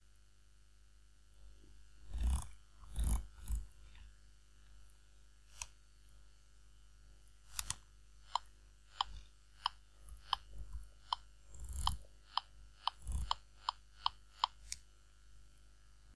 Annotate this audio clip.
Turns on metronome, and adjusting speed